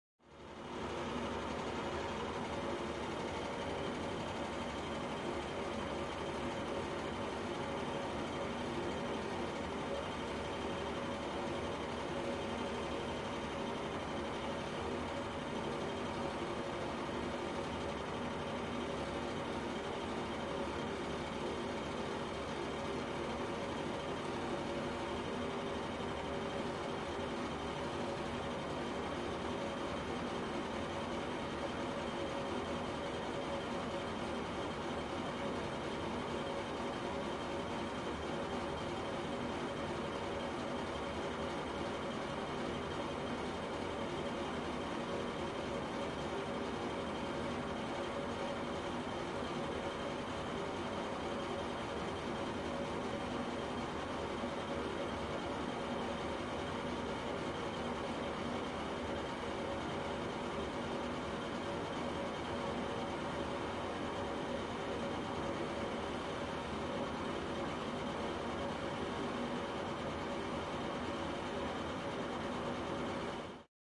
Room Tone - bathroom with vent fan on (fan distant)